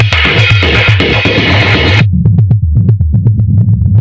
20140306 attackloop 120BPM 4 4 Acoustic Kit Distorted loop4b
This is a variation of 20140306_attackloop_120BPM_4/4_Acoustic_Kit_Distorted_loop1 and it is a loop created with the Waldorf Attack VST Drum Synth. The kit used was Acoustic Kit and the loop was created using Cubase 7.5. The following plugins were used to process the signal: AnarchRhythms, StepFilter (2 times used), Guitar Rig 5, Amp Simulater and iZotome Ozone 5. Different variations have different filter settings in the Step Filter. 16 variations are labelled form a till p. Everything is at 120 bpm and measure 4/4. Enjoy!
granular, distorted, rhythmic, 120BPM, electronic, loop, electro, beat, dance, filtered, drumloop